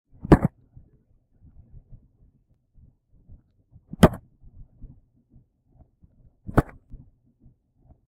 Store Tossing Down Small Box1
ambience, can, checkout, clink, clunk, cooling, crinkle, food, produce, store